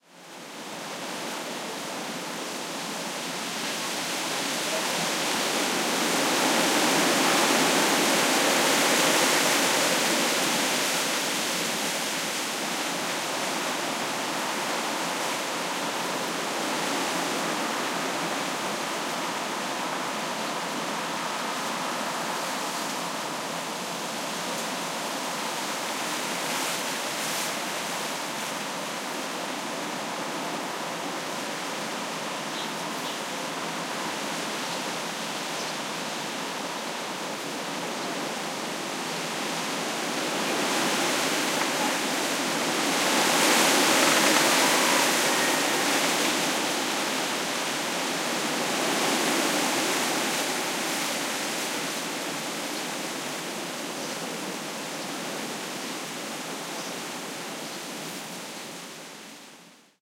20140812 trees.wind
Noise of wind on broad-leaved trees. Recorded at the town of Mondoñedo, Lugo Province (N Spain). Primo EM172 capsules inside widscreens, FEL Microphone Amplifier BMA2, PCM-M10 recorder.